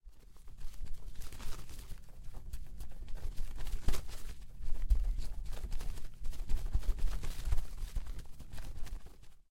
29-Fuego - Viento-consolidated
Air, Fire, Wind